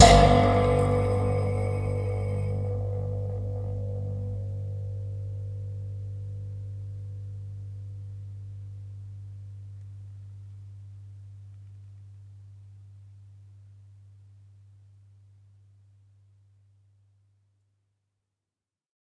This sample pack contains eleven samples of the springs on an anglepoise desk lamp. I discovered quite by accident that the springs produced a most intriguing tone so off to the studio I went to see if they could be put to good use. The source was captured with two Josephson C42s, one aimed into the bell-shaped metal lampshade and the other one about 2cm from the spring, where I was plucking it with my fingernail. Preamp was NPNG directly into Pro Tools with final edits performed in Cool Edit Pro. There is some noise because of the extremely high gain required to accurately capture this source. What was even stranger was that I discovered my lamp is tuned almost perfectly to G! :-) Recorded at Pulsworks Audio Arts by Reid Andreae.
lamp, boing, spring